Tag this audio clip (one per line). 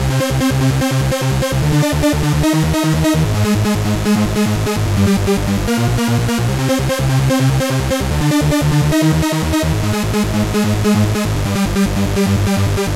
2
arp
sequence
trance